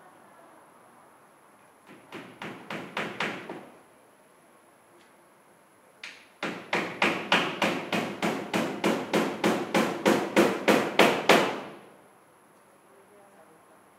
FX - golpes